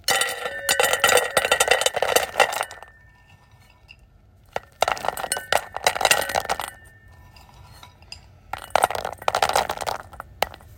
This audio plays dog food poured into a bowl.
Food, Kibble